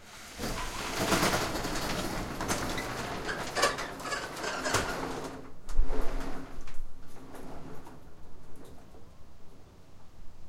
Sound of a roll-up garage door opening. The garage is a standard glass roll-up door. Recorded with a Tascam DR-1 with a wind filter. No post-production work was done to the file.